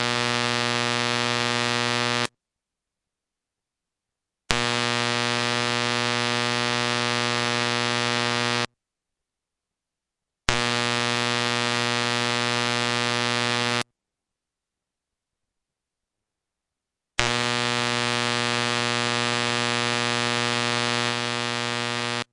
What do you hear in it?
Phone transducer suction cup thing on the ballast of a compact fluorescent light bulb as it it turned off and on.
light, magnetic